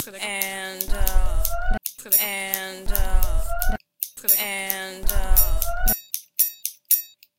Loco-Madison-2016-2017-rythmic-sound-alarm
I decided to record the noise of scissors on a rythm. I did a noise by striking a knife and scissors. And I added two recorded voices. One, is a deep voice with three effects : "bass and schrill", a tempo slighty faster, "repetition". And the other, a hifh-pitched voice with three effects : "amplification", "echo", "repetition".